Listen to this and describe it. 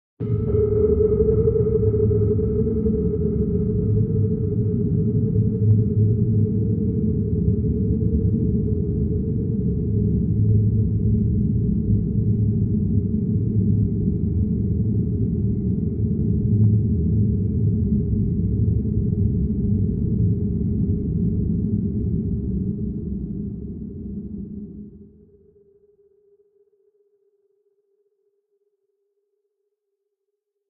Dissonant Winds
A cool effect starting out with a Minor second tone tapering off with drone.
Atmosphere, Background, Cinematic, Dissonant, Drone, Electronic